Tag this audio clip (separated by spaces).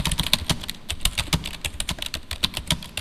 typing campus-upf UPF-CS13 computer